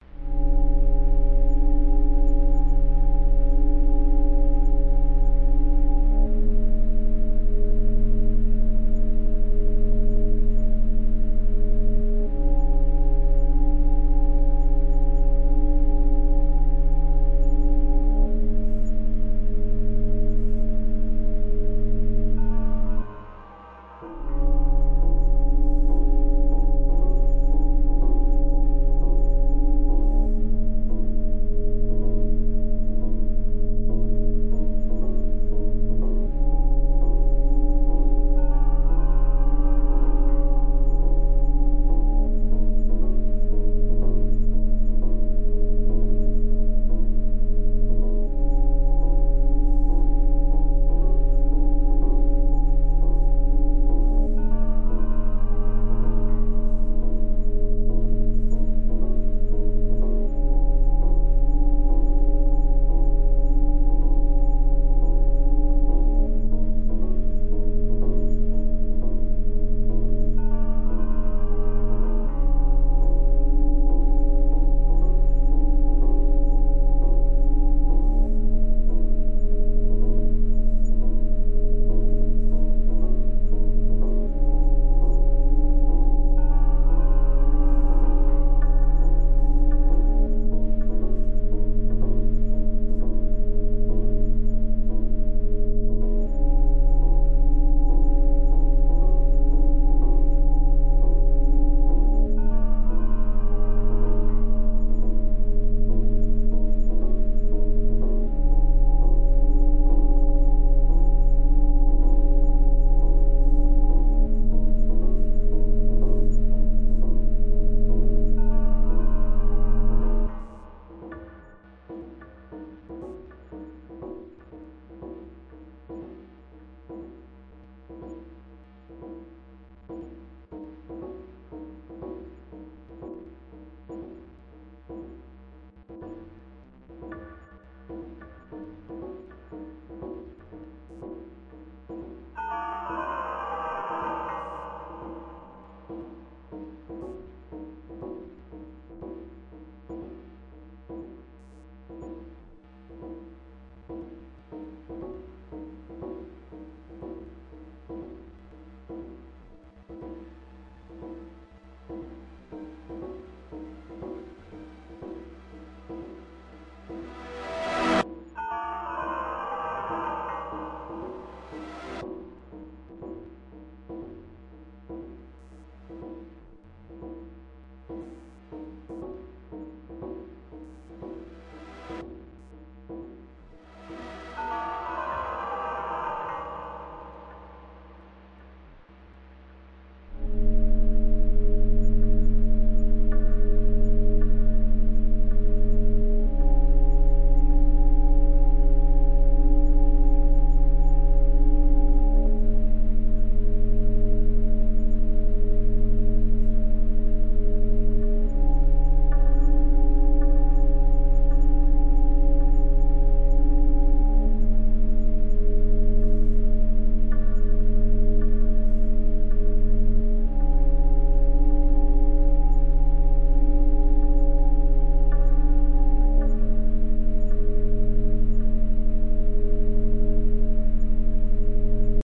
A loop-able horror ambience piece, inspired by films such as The Blair Witch Project.
This piece synth based and was created in Presonus Studio One using NI Massive and NI FM8. All other effects are the stock mixing tools provided by Studio One.